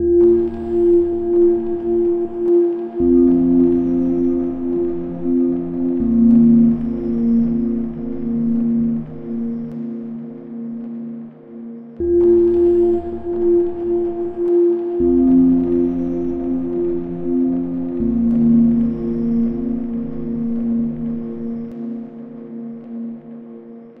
Slow Sad Tones
A slow sad tone loop